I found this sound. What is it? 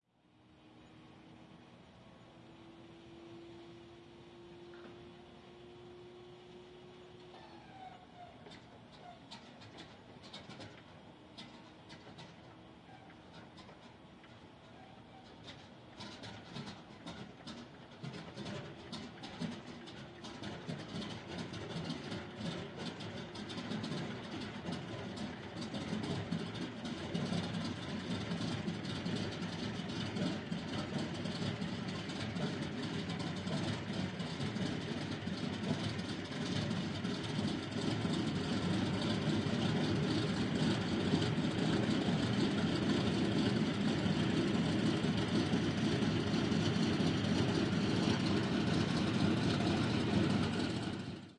P-47 Thunderbolt Startup
This is the sound of a cold Replublic P-47D Thunderbolt starting up its massive Pratt & Whitney R-2800 Double Wasp engine.
R2800, Thunderbolt, Double-Wasp, WWII, startup, Pratt-and-Whitney, P-47, engine